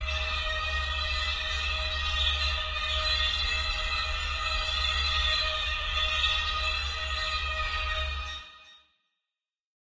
cello cluster 2
Violoncello SFX Recorded
Bell, Cello, Cluster, Hit, Violoncello